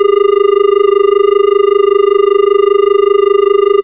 cont ringtone
BT Continuous Ringing Tone
bt, continuous, ringing, tone